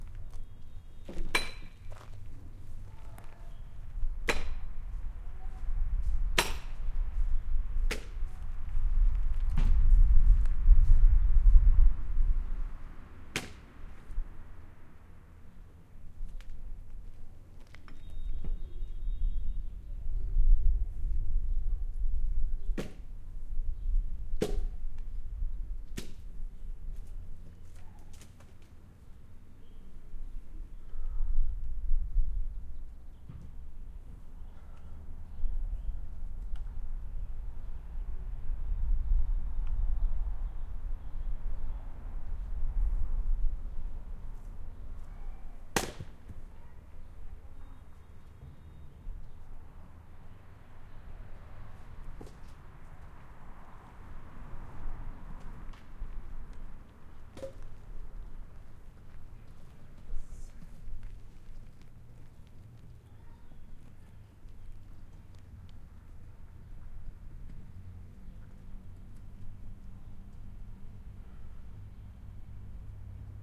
enregistrement d'ambiance sonore avec homme qui fend du bois